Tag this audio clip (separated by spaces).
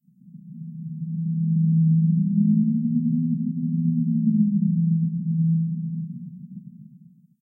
Effect Riser Alien FX Whoo Bottle-blow